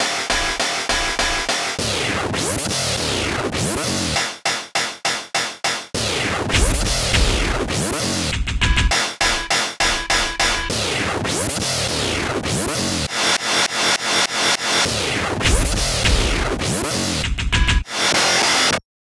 Guitar synth vst from Slayer 2 VST, using a amp modeller VST (crunch ohm i believe), and coldfire distortion VST, used dblue's Glitch VST, FL7XXL used as a VST host. made this for a pile of samples i've given out for projects and nothing has come out of them, so i'm giving it out to everyone and anyone now. 100 BPM. supposed to be the beginning of the loop sequences i made. rendered it all as one loop in case I forgot any samples as one-shots